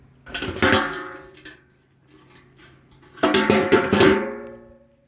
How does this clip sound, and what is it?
Garbage can lid sound
household, noise, can, garbage